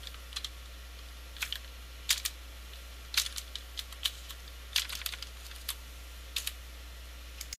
keyboard clicks
this is a key-board sound for: lego brick films, clay-mation, and other stuff